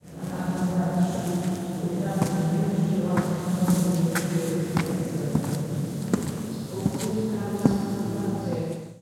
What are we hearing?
20190302.church.steps
Noise of footsteps in a reverberant hall. EM172 Matched Stereo Pair (Clippy XLR, by FEL Communications Ltd) into Sound Devices Mixpre-3 with autolimiters off. Recorded inside Mosteiro de Flor da Rosa church (Crato municipality, Portalegre district, Alentejo, Portugal)